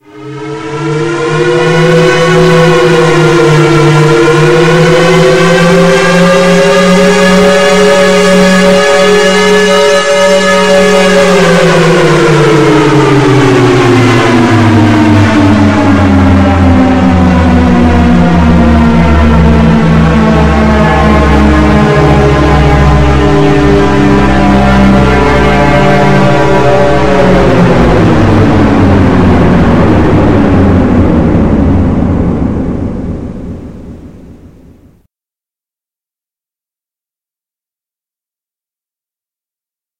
3 Toned Mega Siren of Doom
An approximation of a 3-toned engine powered/blower stationary air raid siren so powerful that it has to be set on a hill at least 2 miles from a population center. Based on a Chrysler engine powered siren.
air, air-raid-siren, civil, defense, doom, raid, siren, warning